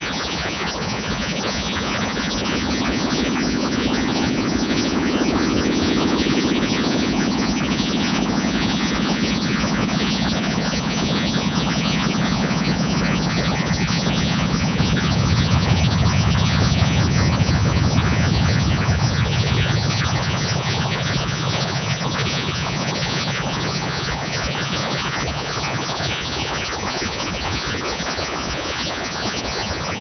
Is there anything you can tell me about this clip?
Noisy space sound created with coagula using original bitmap image.
synth, ambient